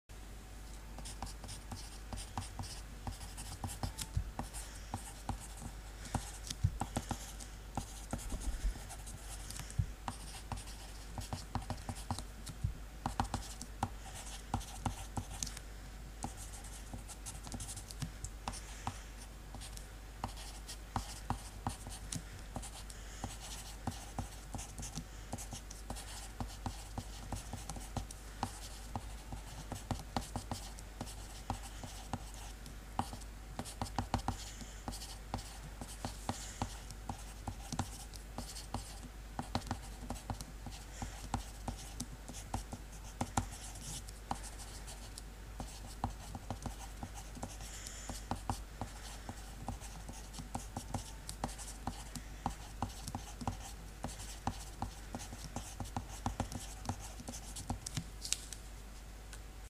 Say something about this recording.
Pencil Scribbles
Sound of a pencil writing on paper, basically I just wrote random words for a minute.
Graphite, Paper